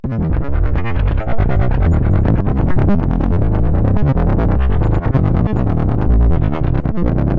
Abstract Glitch Effects / Made with Audacity and FL Studio 11